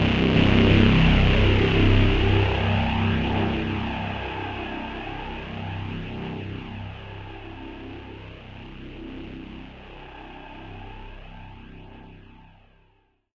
THE REAL VIRUS 08 - BANDPASS VOWELPAD - C1
Big full pad sound. Nice filtering. All done on my Virus TI. Sequencing done within Cubase 5, audio editing within Wavelab 6.
pad, multisample